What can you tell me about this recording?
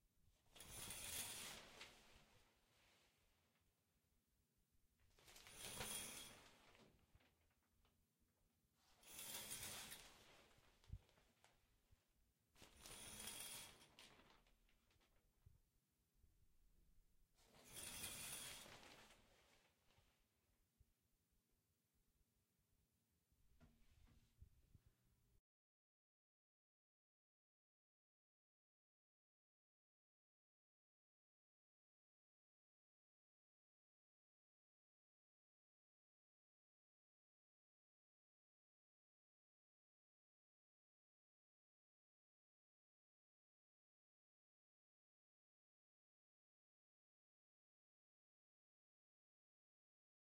untitled pulling curtain
curtain, field-recording